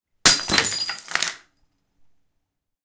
Glass Smash, Bottle, H
Raw audio of dropping a glass bottle on a tiled floor.
An example of how you might credit is by putting this in the description/credits:
The sound was recorded using a "H1 Zoom V2 recorder" on 19th April 2016.
smash, shatter, bottle, smashing, crash, tile, break, glass